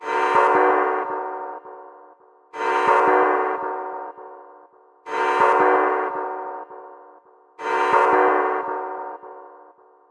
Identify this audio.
ominous bin lid
Sampled bid lid with Rode NT5, processed with Iris 2, Resonance applied with Guitar Gig 5 ResoChord
sinister, ominous, tense, drama, fear, bin, creepy